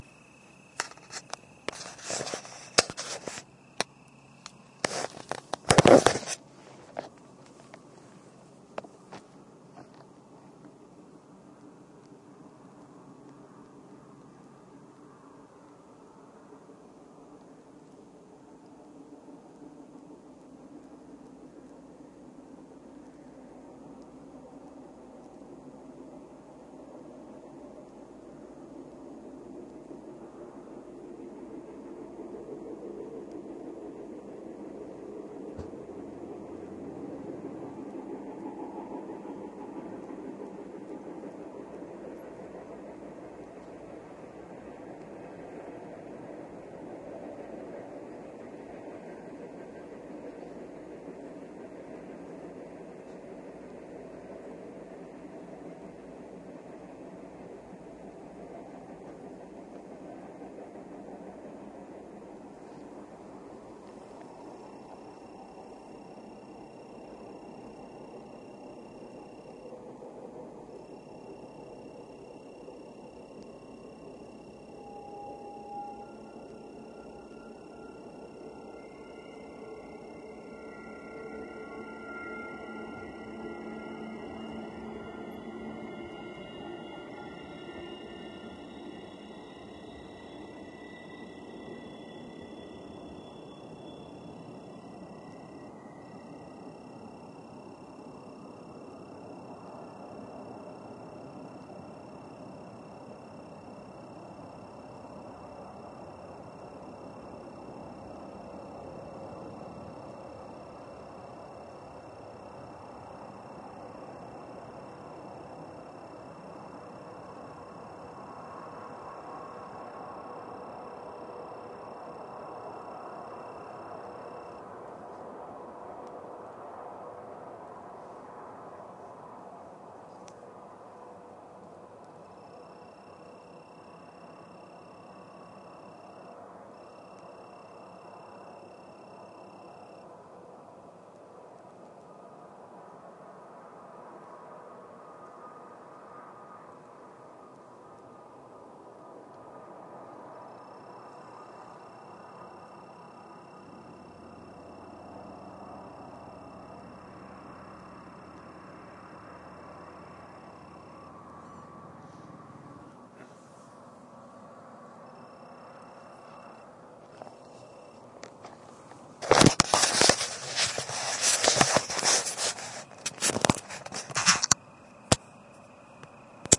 montanatrain-cricketsambience
train ambiance crickets night